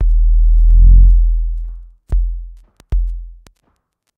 Making weird sounds on a modular synthesizer.